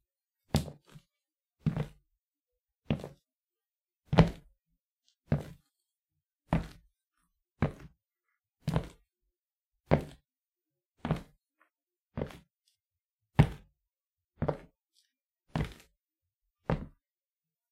Footsteps Wood
The sounds of footsteps on wood.
This sound was made as part of a college project, but can be used by anyone.
wood, footstep, walking